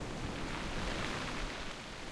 Wave on Shore 2
Another wave hitting a rocky shore. You can hear the rocks getting displaced by the wave.